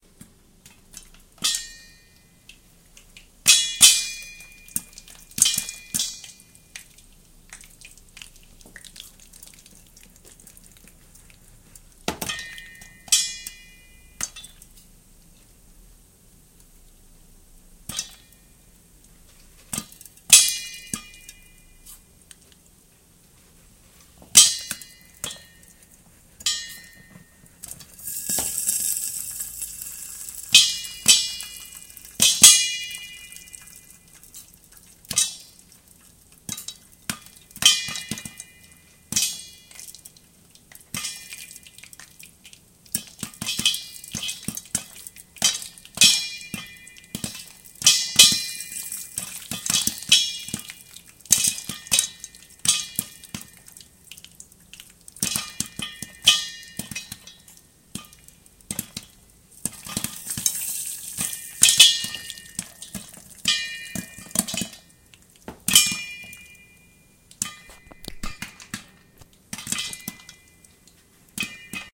popping popcorn the old fashioned way (stove top) in a pitched pan
field-recording, metalic, percussive, popcorn, sizzle